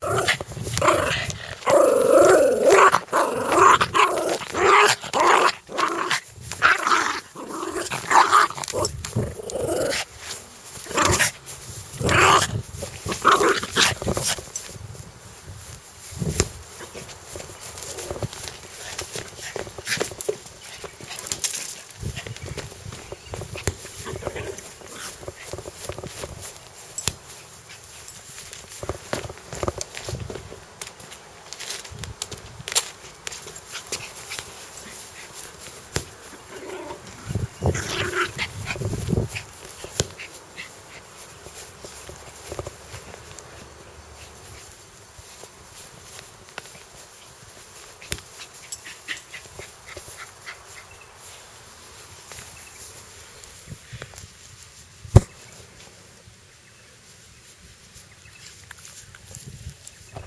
Dog growling
A maltese terrior cross growling when its ball is being snatched away.